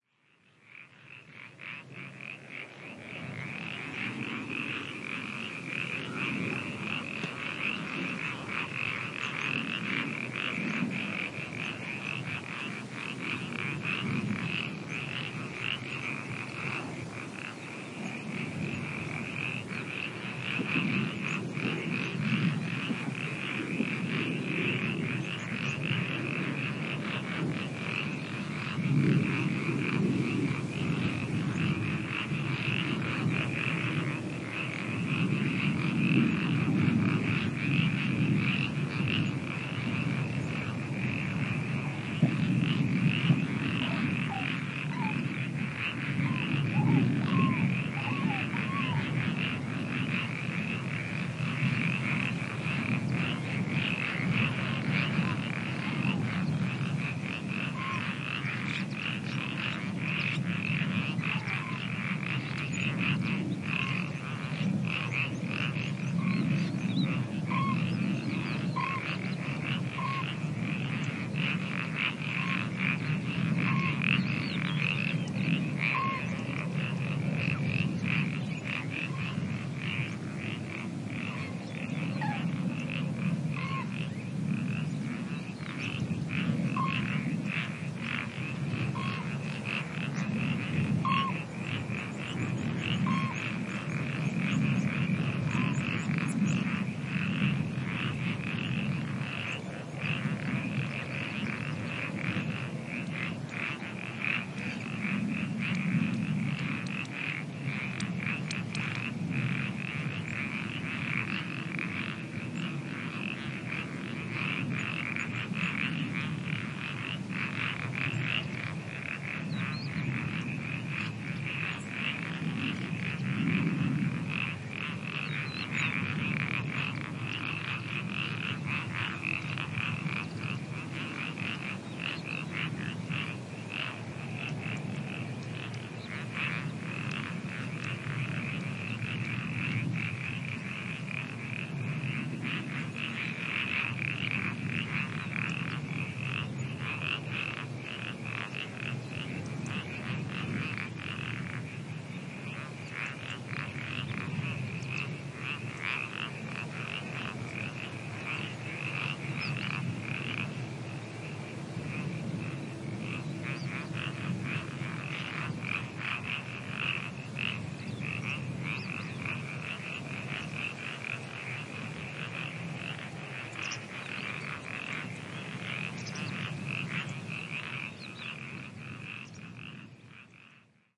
Croaking frogs in marsh, at 1' callings from overheading Crane. Sennheiser MKH 60 + MKH 30 > Shure FP24 preamp > Tascam DR-60D MkII recorder. Decoded to mid-side stereo with free Voxengo VST plugin